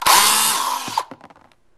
JBF Broken Drill 2
A broken electric drill. (2)
broken, drill, electric, mechanical, shop